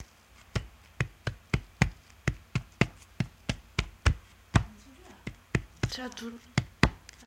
hitting desk with hands
This is a recording that I hit my desk with my hands. Recorded it with my phone. I didn't edited it.
desk, hands